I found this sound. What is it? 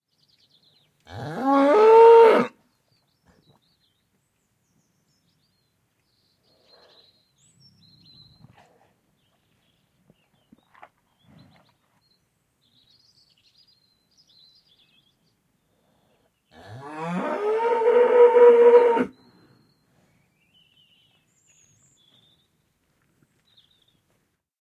Cow Close
A stereo field recording of a cow lowing twice. In between she wipes her nose with her tongue (as they do). Close mic'd with a Rode NT4 > FEL battery pre-amp > Zoom H2 line in.